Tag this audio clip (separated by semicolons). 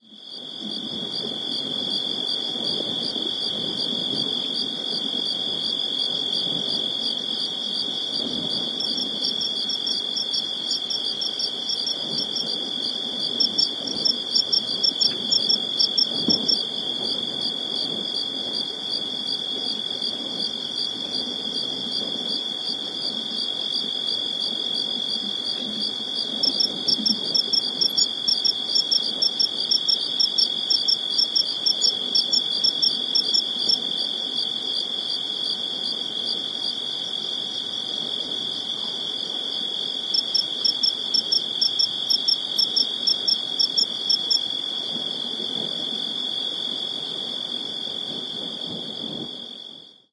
field-recording; Joshua-Tree-National-Park; PCM-D1; insects